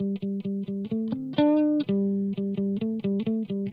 guitar recording for training melodic loop in sample base music
electric, guitar, loop